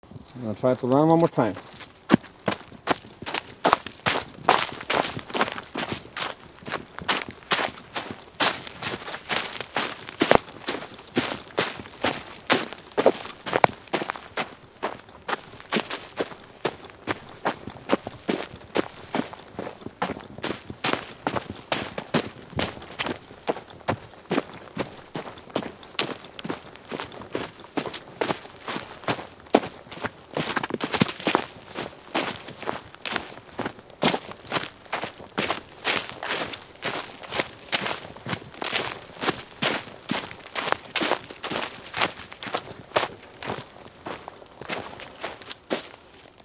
walking fast inside a forest